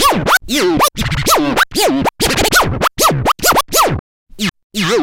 scratch243 looped
Funky scratching a vocal phrase. Technics SL1210 MkII. Recorded with M-Audio MicroTrack2496.
you can support me by sending me some money:
looped, vocal, record, beat, turntablism, battle, scratch, hiphop, dj, cut, vinyl, loop, scratching, riff